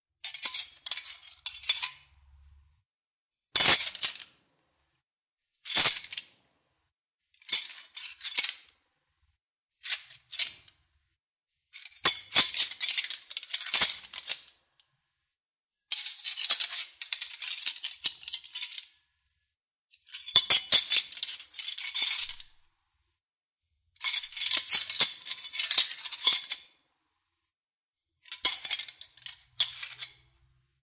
wrenches rustle clank
A bunch of wrenches, rustle and clanks. Searching for a right wrench.
Recorded with Oktava-102 microphone and Behringer UB1202 mixer desk.
rustle, wrench, metal, foley, clank, clink, craft, search